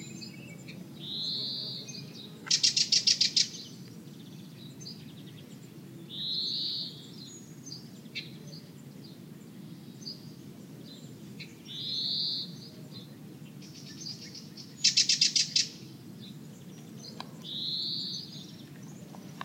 call of warbler. Sennheiser ME62 > iRiver H120 / una curruca